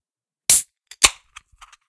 Beer can opening recorded with Zoom H6 and slightly processed.
Use it anyway you like.
Enjoy)
pop, can, click, open, opening, beer